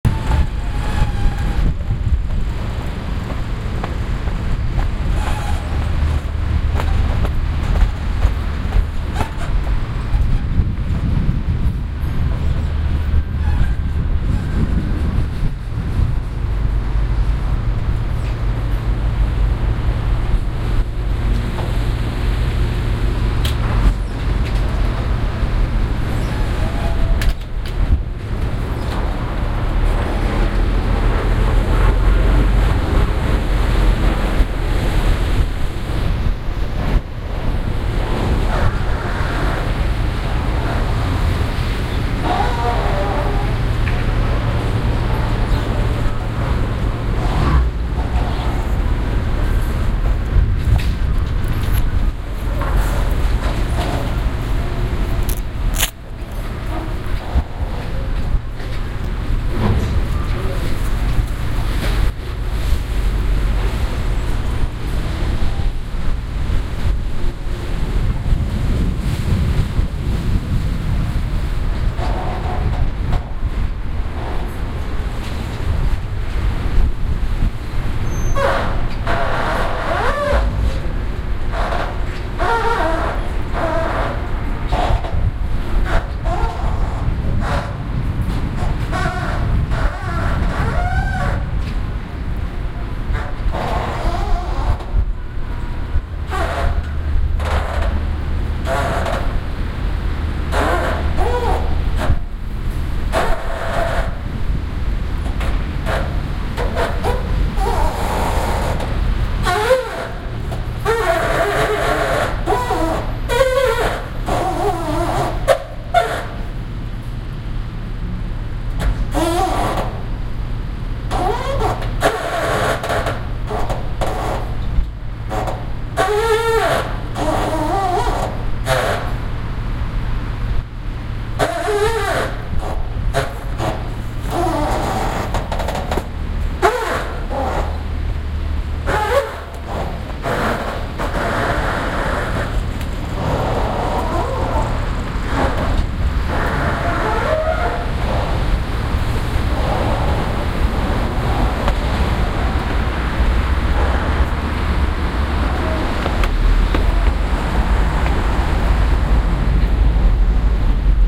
Embankment - Standing on pier: squeezing bridge
ambiance, ambience, ambient, atmosphere, background-sound, city, field-recording, general-noise, london, soundscape